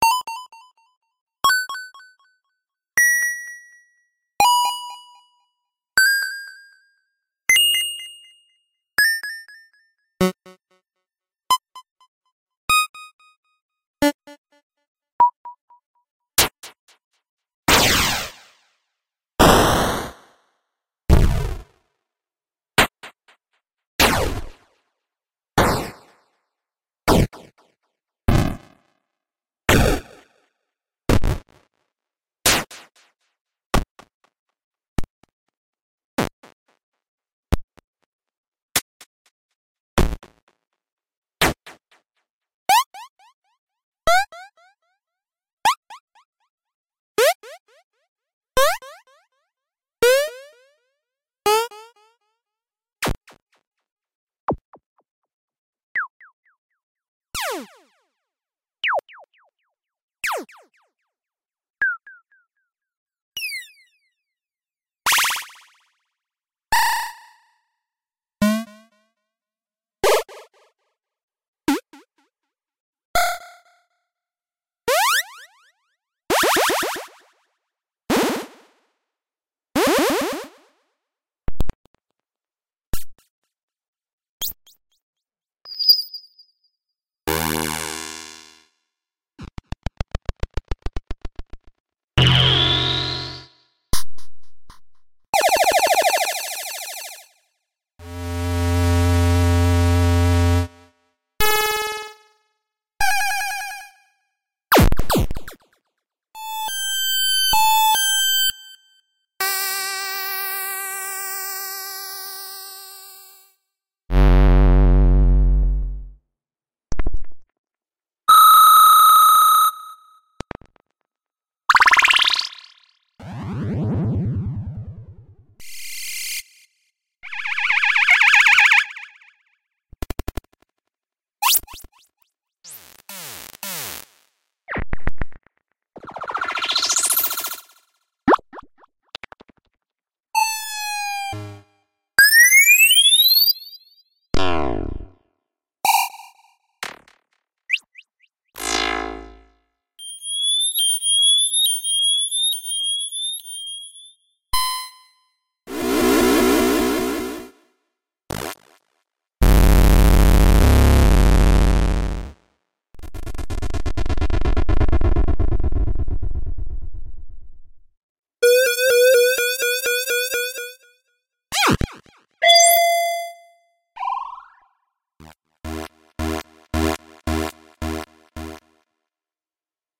8-Bit Sound Effects -Lo-Fi Bleeps, Bloops, Zings, Zaps and more
A 3 minute collection of all original lo-fi sound effects for video games.
Created using CFXR